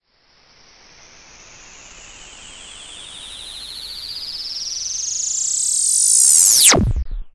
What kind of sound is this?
REVERSE ZAP 02
Effects created with the venerable Roland SH2 synthesizer. Various resonance effects with processing.
effect, synthesizer, fx, effects, roland, sh2, synth, electronic